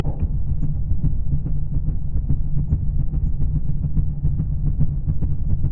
This tribal beat was originally a hot dog warmer, recorded on a Zoom H2 when out and about. Of course, it's been slightly modified.